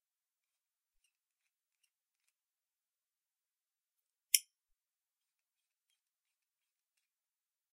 Screwgate Caribiner
A dual mono recording of a screwgate carabiner being unlocked, snapped and re-locked . Rode NTG-2 > FEL battery pre-amp > Zoom H2 line in.